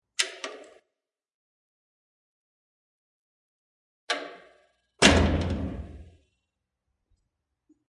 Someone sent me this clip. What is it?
Metallic Door (Open Close)
close, door, metallic, open, outside